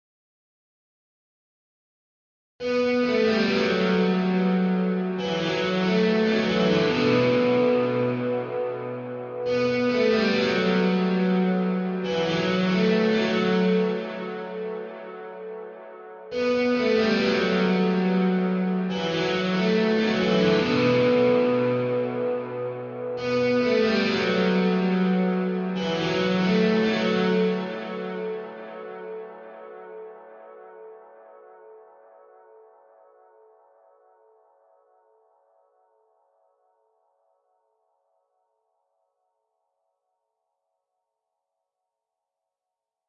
Space Electric Guitar Riff, Chill
70 bpm. Chords, Bm, D, A (x2)
Slow electric guitar riff, good for a lead in a spacey, chill song. Used this myself and will link the song when posted.
Airy, Chill, Delay, Echo, Electric, Guitar, Lead, lofi, Lo-Fi, Loop, Loopable, Reverb, Riff, Slow, Solo, Space